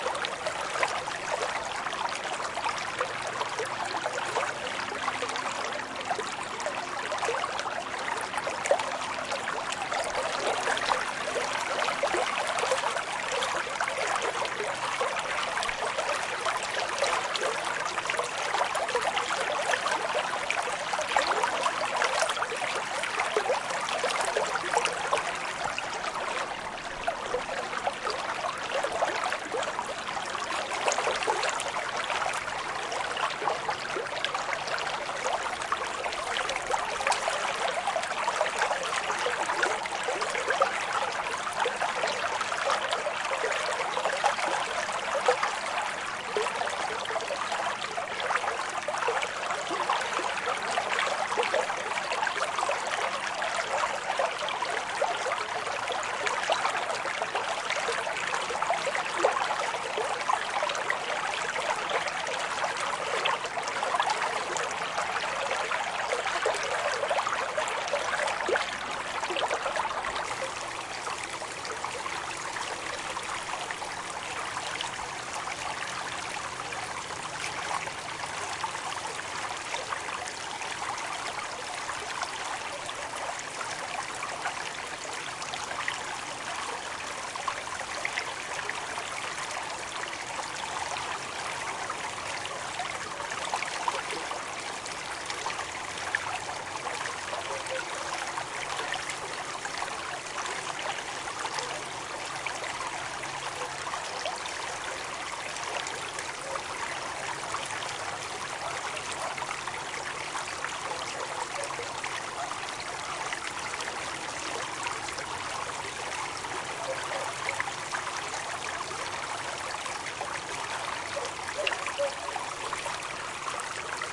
Small stream with faster stream sounds in first part and slower stream sounds in second part
flowing, river, stream, water